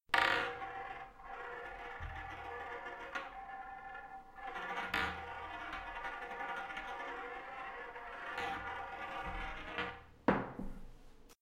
Ball in hole
I recorded this for a pinball game I worked on. It's a sound of a marble dropping onto a table and then rolling around for a bit, finishing in the marble dropping into a hole (falling onto the carpet). It's meant to simulate the sound of a pinball being put into play on a pinball table. Enjoy!
Pinball, Marble-on-table, Clack, Marble-dropping-on-carpet, Rolling, Marble, Thump, Dropping, Pinball-table